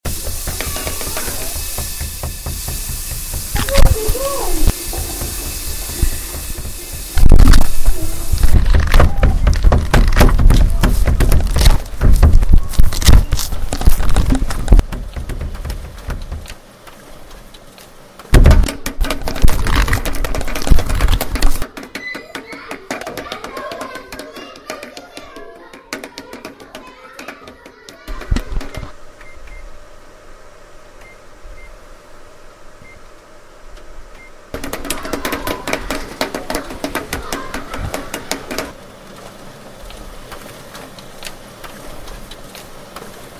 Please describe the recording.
TCR ssonicpostcard-simon,malo
Pac, France